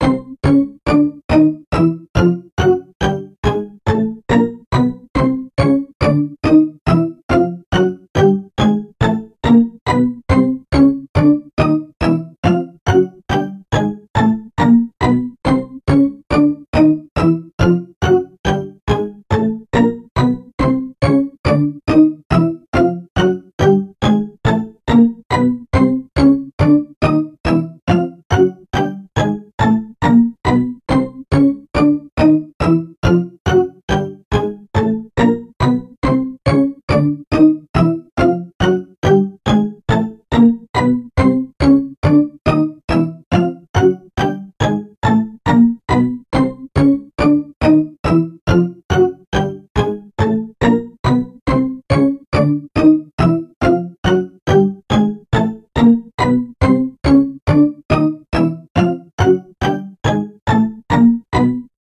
This is an example of the 'pitch paradox' as notes in a chromatic C scale, listen to the rising pitch, and it seems to keep rising forever, which is impossible, hence the paradox :)